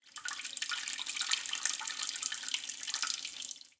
37 - 8 Urination - Short
Sound of urination - Short version
urination, Pansk, CZ, Czech, Panska, water, toilet, pee